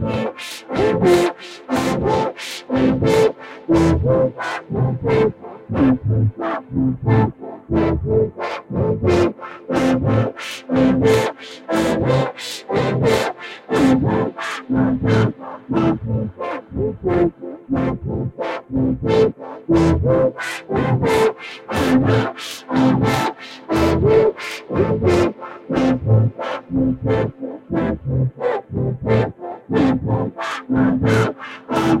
Raven 2b loop
Proof of concept that a musical loop with lots of instruments can still be processed into something very different and usable in a different musical context.
Used luckylittleraven's loop:
Volcano dual filter with various LFOs controlling the frequency of the two filters in series mode.
Since some of the controlling LFOs were very slow I played the original loop twice. I think in this one it cycles through the whole LFO sequence on the filter cutoff frequencies.
Ping-pong delay added for spaciousness...
The cadence of the filter movement gives this loop a slower pace than the original, while the range of the frequency sweep still manages to keep some drama/excitment.
120-bpm, C-major, filter, loop, music, musical, sequenced-filter